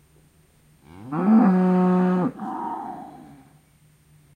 1192 cow close
Mooing cow close to the mic. Could be used as ringtone. Sony ECM-MS907, Marantz PMD671.
farm, environmental-sounds-research, low, pasture, mooing, lowing, cow, moo, countryside, ringtone